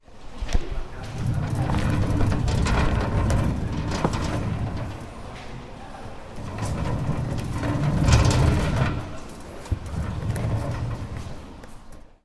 Books cart
Sound produced when you move the cart to carry library books. This sound was recorded in the library of UPF.
books, campus-upf, cart, library, UPF-CS13